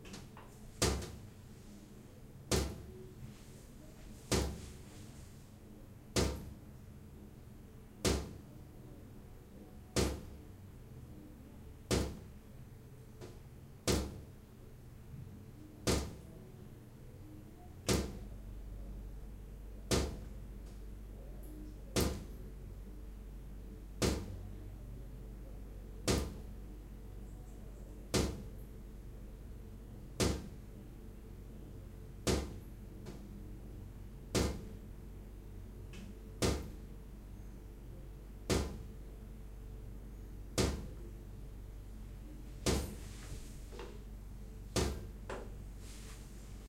Shower leaking dripping
shower dripping into the bathtub after turning it off;
recorded in stereo (ORTF)
bathroom, dripping, leaking, shower, water